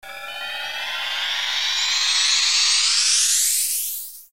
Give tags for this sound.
se; shine; sweep

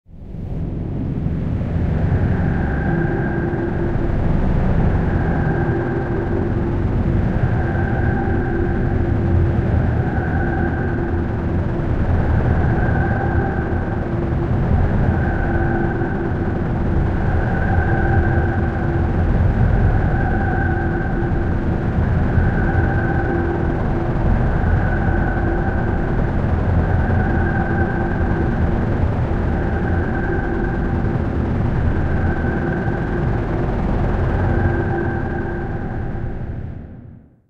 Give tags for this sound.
aliens; criminal; extraterrestrial; mutant; suspence; terror; transformers

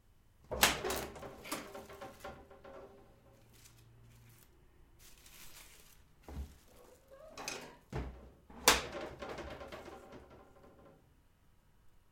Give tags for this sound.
close; kitchen; household; kitchen-cabinet; open

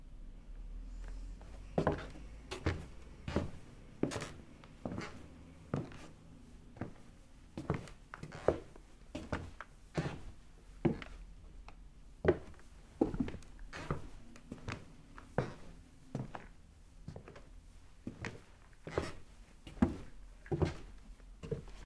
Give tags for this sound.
step; steps; stepping; footstep; walking; footsteps; foot; wood; walk; wooden-floor

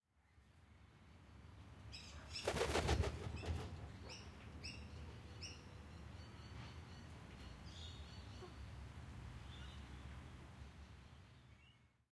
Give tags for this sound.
wings; gymnorhina-tibicen; magpie; beating; flap; air

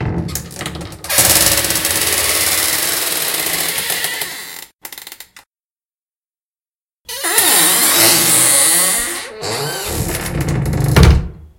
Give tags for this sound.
Mansion; Squeak; Opening; Horror; Squeaky; Old; Door; Creepy; Creaky; Close

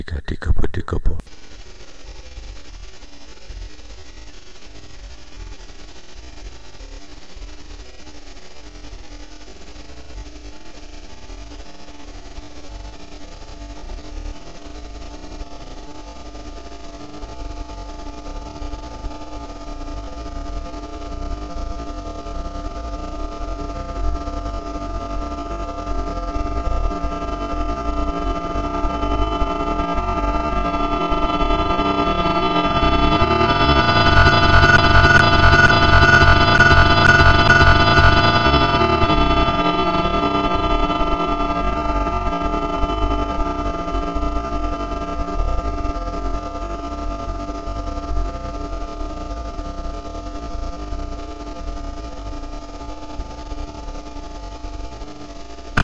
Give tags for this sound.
bing-ding; cliff-clunk; mi; pow; qutipa; ta

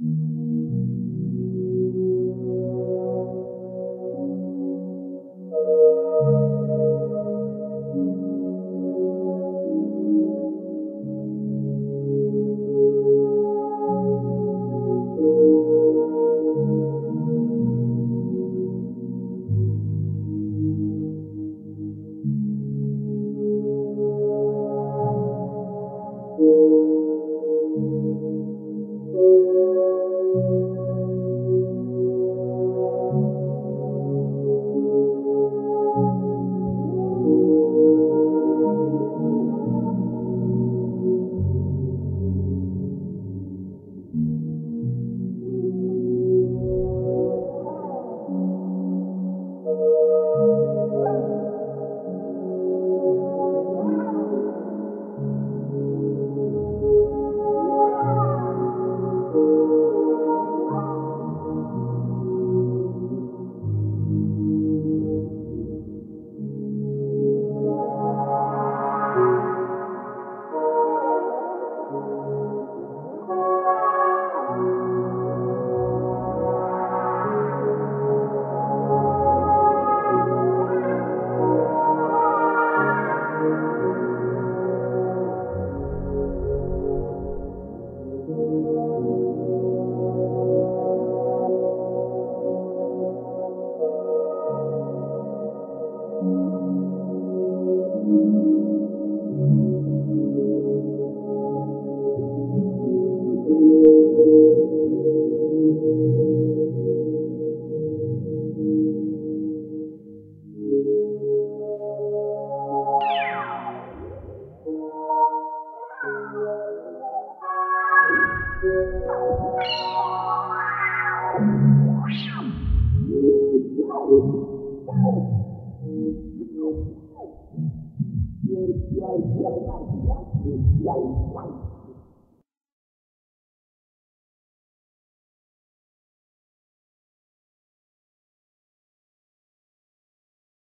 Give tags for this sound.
174; 174bpm; autonomic; chord; chords; pad; roland; sh-201

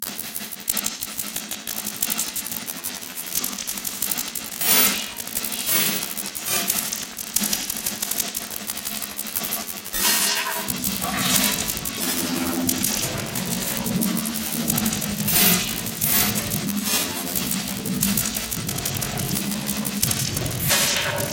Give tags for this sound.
virtual; idm; soundscape; glitch; d; m; background; processed; noise; harsh; ey; drastic; dark; dee-m; ambient; pressy

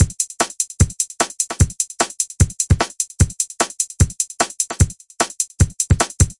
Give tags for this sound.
150-BPM; drumloop; kick-hat-snare

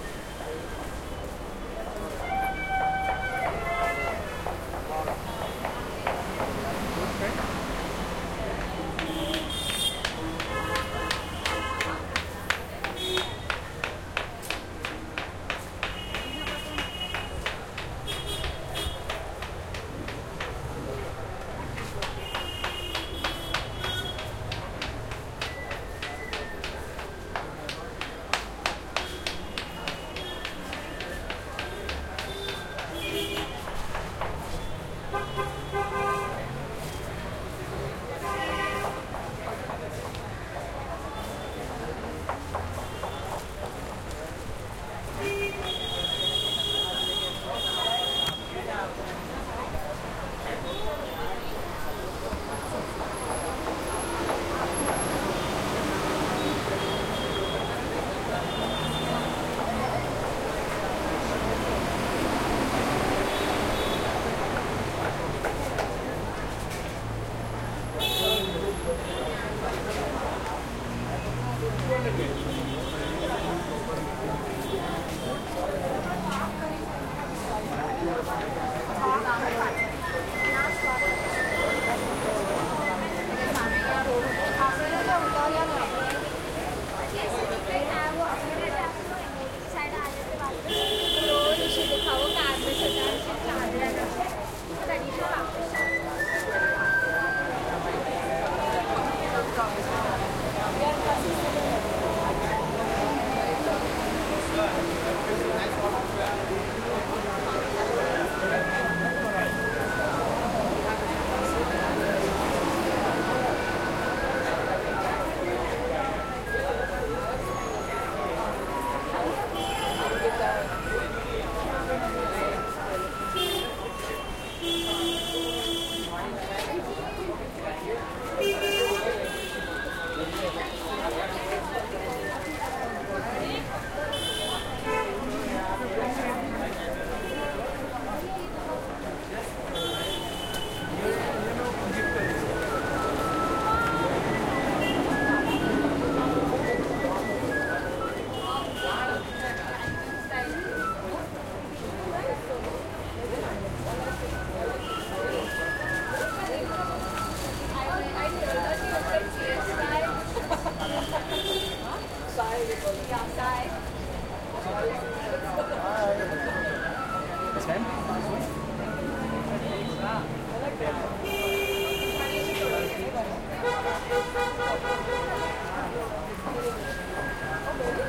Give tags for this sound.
heavy; busy; India; people; vendors; traffic; covered; crowd; street; throaty; market; ext; int; sidewalk

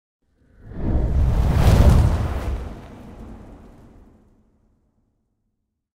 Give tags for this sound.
Fast; Fireball; Woosh

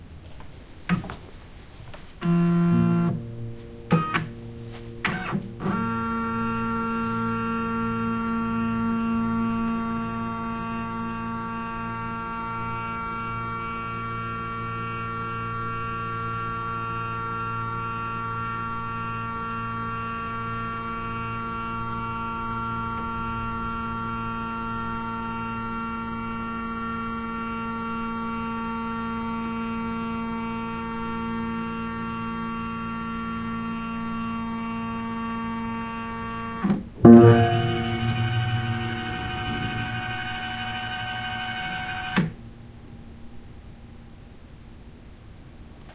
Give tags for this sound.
hum; note; scanner; strange

